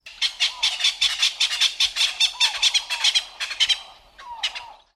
A bird in Tanzania recorded on DAT (Tascam DAP-1) with a Sennheiser ME66 by G de Courtivron.
bird, africa, jungle, bush, birds, tanzania